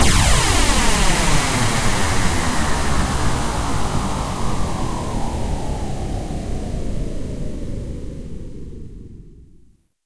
PINK NOISE DOWNSWEEP 01
A blast of pink noise with an extreme phasing effect leading to flanging.
down, falling, pitch, sweep, phase